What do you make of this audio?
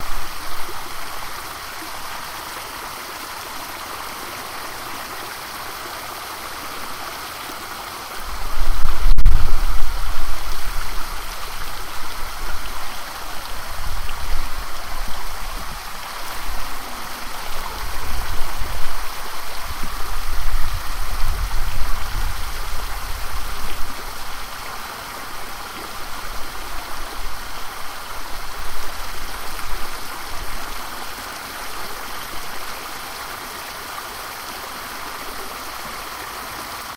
Cascading Water #2
Water Feature at Uni
field-recording, fountain, water